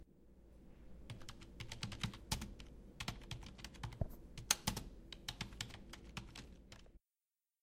The sound of someone keying the computer’s keyboard. It has been recorded with the Zoom Handy Recorder H2 very closely to the sound source. It has been recorded in the 54.009 room of the Tallers building in the Pompeu Fabra University, Barcelona. Edited with Audacity by adding a fade-in and a fade-out.